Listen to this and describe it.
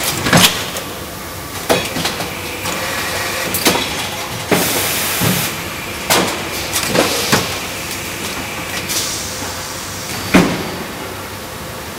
die, industrial, machine, factory, field-recording, metal, processing